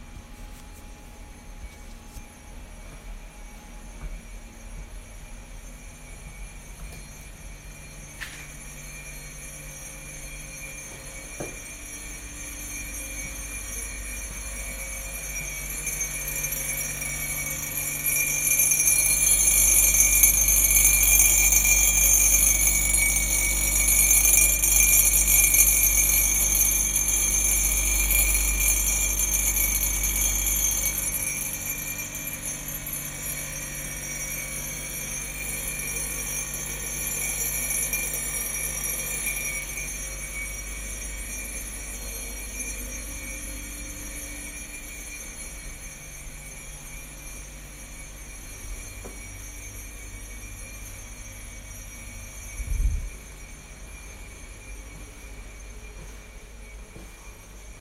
metall spoon rattling impulse 19.03.2020 17.44
this is the sound of the rattling sound of a metal spoon inside a mug on a water bottle on a drinking cooler.
ambient, experimental, noise, soundeffect